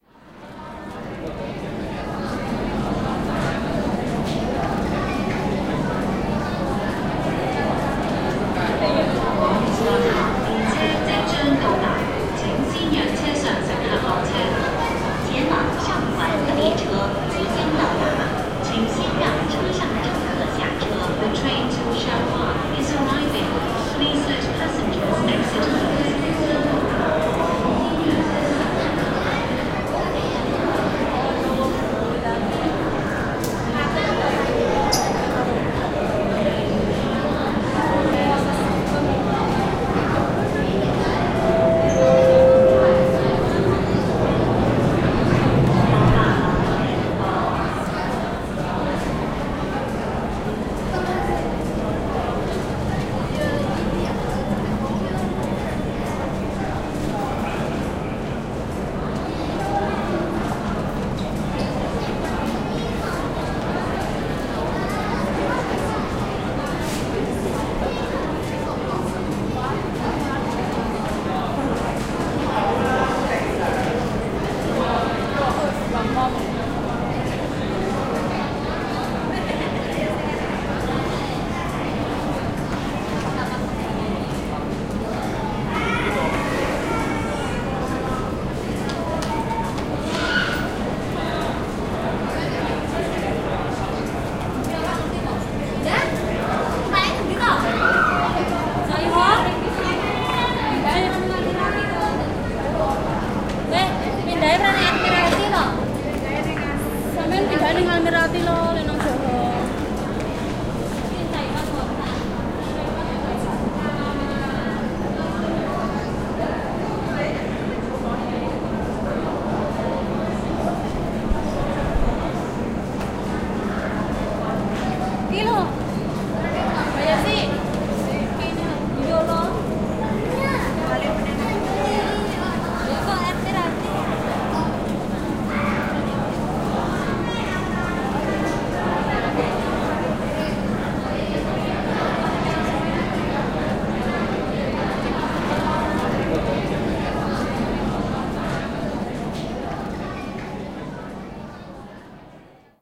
HK MTREnv
HongKong MTR platform
hongkong, subway